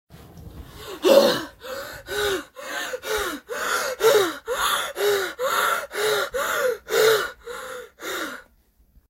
Fast Breathing
Part of Screaming/Vocal horror pack. Might be useful for a horror game of some sort, or for after a running scene.